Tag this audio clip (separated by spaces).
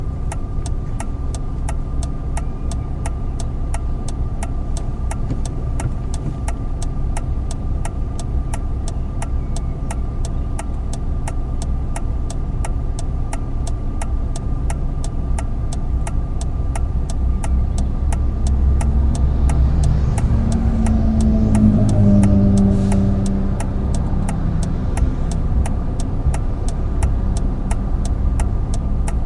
Blinker Car Indicator Transport Vehicle